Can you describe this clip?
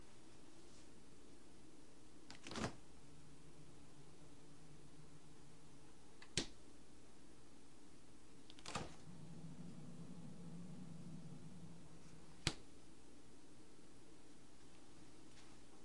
open and close fridge then freezer
this is my fridge and freezer opening and closeting. it was recorded with a sennheiser e835 dynamic microphone, and a behringer tube ultragain mic100 preamp.
door, open, open-fridge